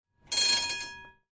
11-2 Tram bell
The sound of tram bell
bell, CZ, czech, panska, tram, trambell